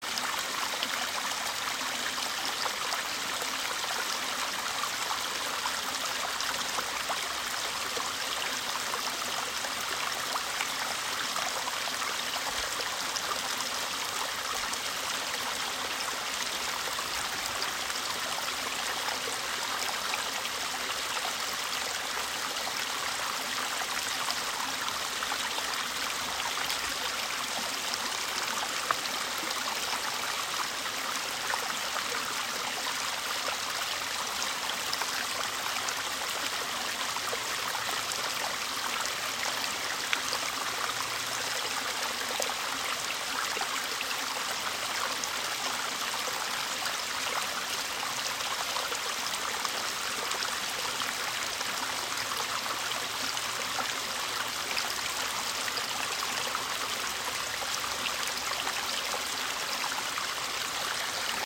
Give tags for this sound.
fast; stream; water

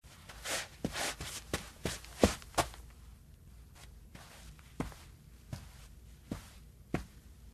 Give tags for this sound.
carpet; footsteps; kid

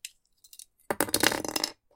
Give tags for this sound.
Breaking; Crashing; Drop; Falling; Impact; Machine; Magazine; Scratch; Thud

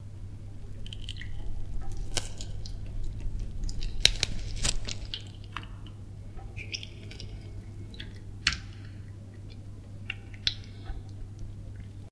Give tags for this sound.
bones; horror-effects; neck; torso; horror; horror-fx; squelch; break; fx; leg; effects; flesh; arm; limbs

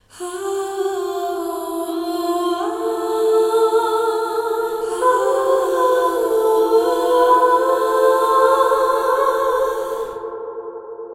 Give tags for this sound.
female-vocal; life-drags-by; pining; soft

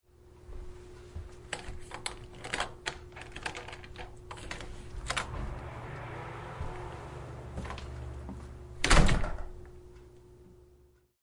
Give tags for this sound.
doors; opening; closing; open; deadbolt; close; shut; lock; fumble; outside; slam; door

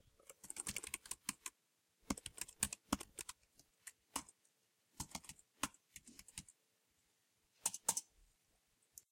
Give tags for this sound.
shift; essay; Keyboard; key; typer; letter; keys; keypad; stereo; typing; type; computer; words; homework

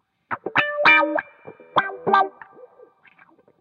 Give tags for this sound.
bpm100; wah; fm; guitar; samples

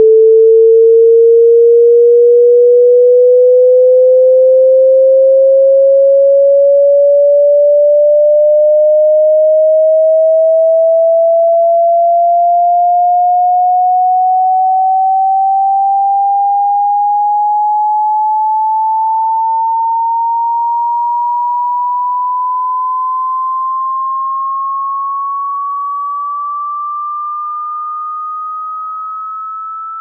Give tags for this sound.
chip; chirp; sine

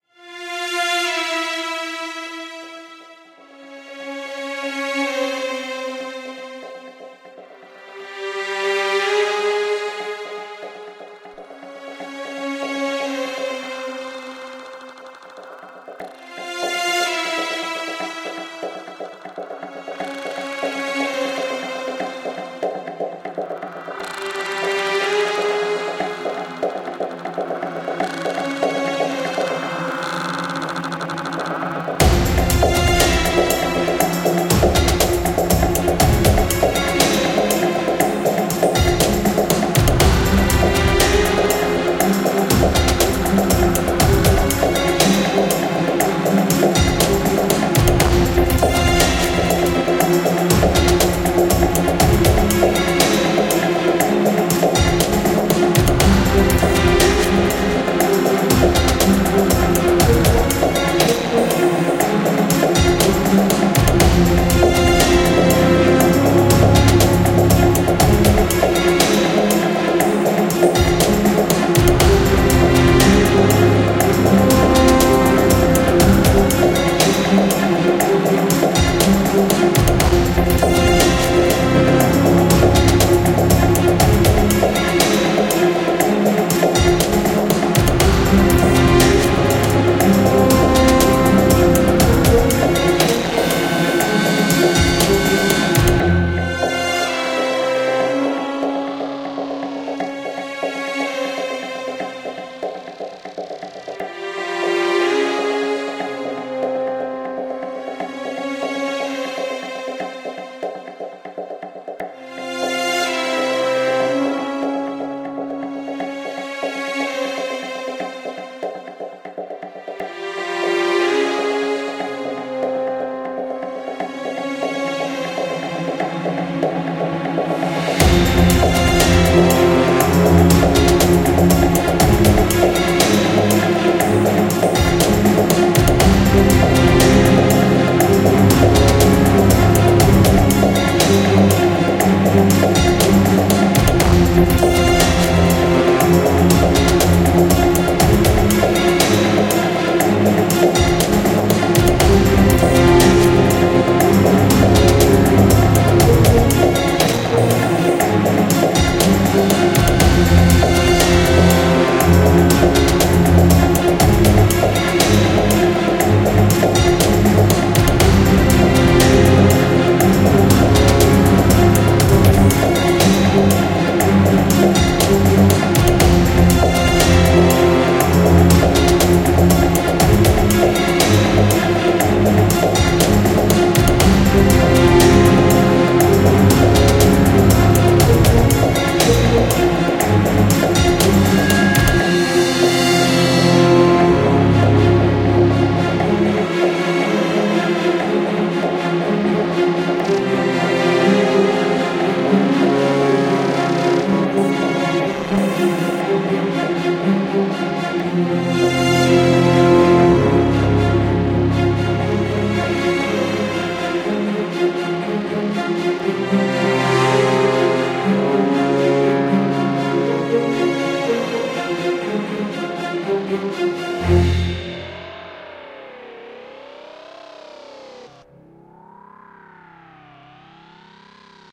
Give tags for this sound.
ethnic; classical; flute; sci-fi; electronic; voice; experimental; software; music; voices; choral; first-nations; instruments; cinematic; singing; choir; native; neo-classical

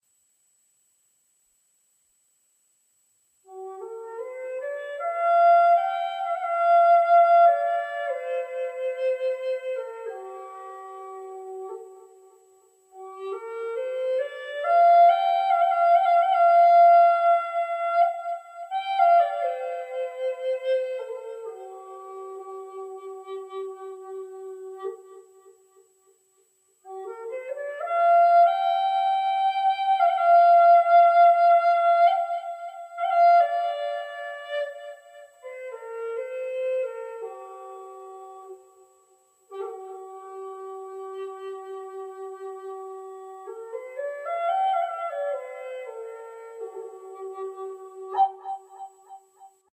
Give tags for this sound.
flute; melody; native; original; wind

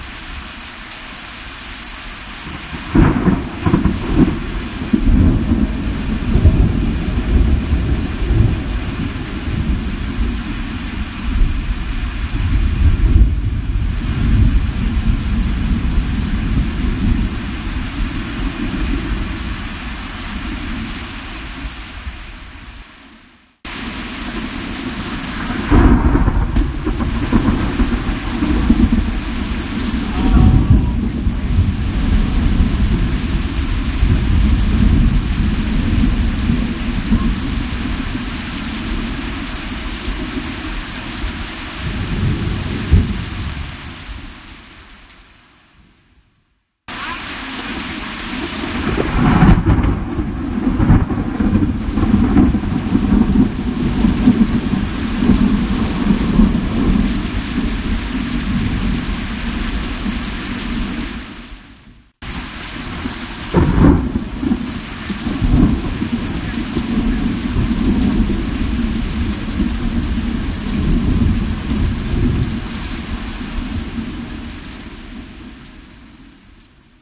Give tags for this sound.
lightning; thunder-storm; rumble; thunderstorm; thunder; storm; rainstorm; rain; shower; raining; weather

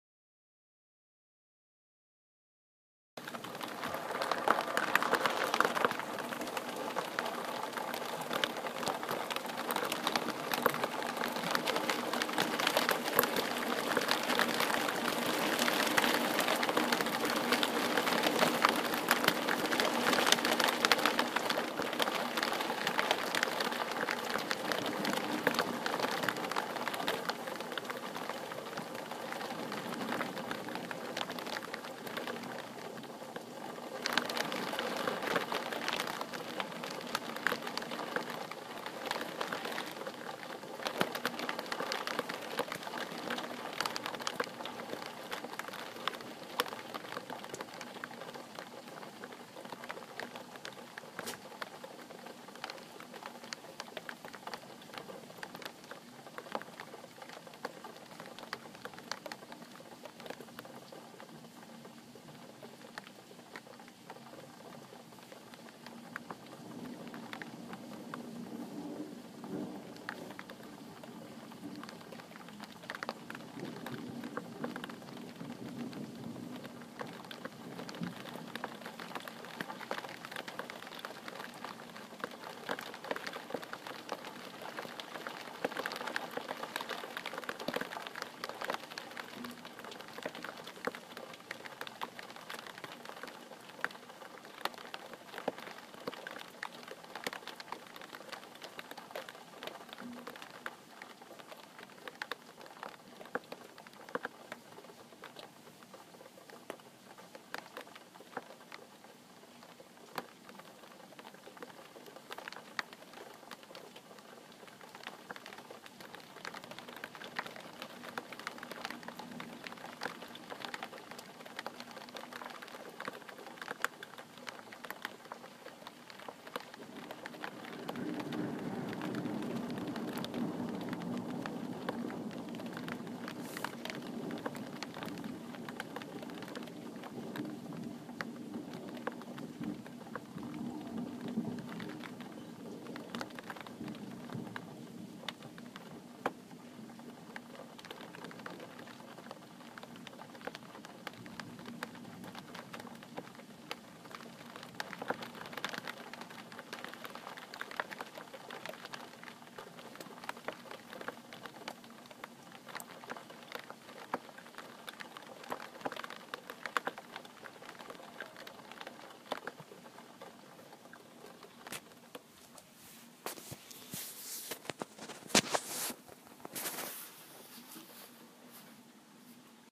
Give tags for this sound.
lightning; rain; strom; window; thunder; storm

